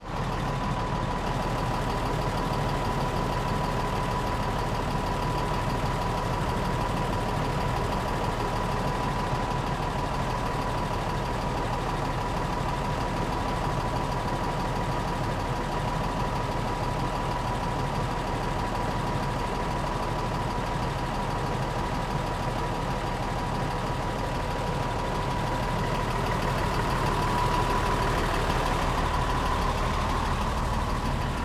Bus Engine 001
bus, engine, vehicle